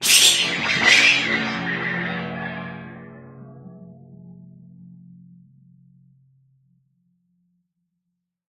BS Wobble 13
metallic effects using a bench vise fixed sawblade and some tools to hit, bend, manipulate.